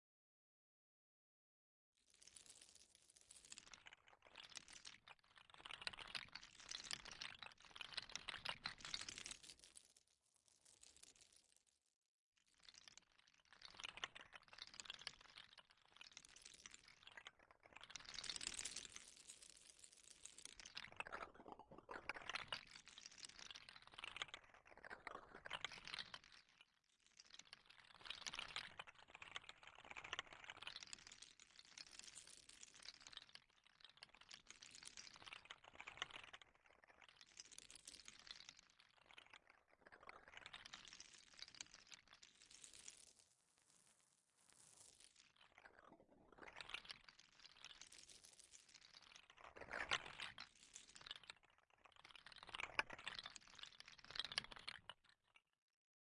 Made in Ableton Live, don't remember how exactly. It's a nice texture, though.
ableton-live, textural, texture